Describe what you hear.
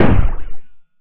Techno/industrial drum sample, created with psindustrializer (physical modeling drum synth) in 2003.
drum, metal, synthetic